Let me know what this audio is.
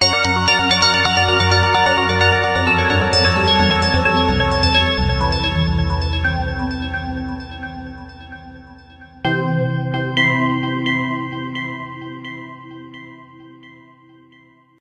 Game Loops 1
You may use these loops freely if
you think they're usefull.
I made them in Nanostudio with the Eden's synths
(Loops also are very easy to make in nanostudio (=Freeware!))
I edited the mixdown afterwards with oceanaudio,
;normalise effect for maximum DB.
If you want to use them for any production or whatever
23-02-2014